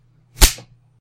A slingshot sound
effect, fx, sound, sound-effect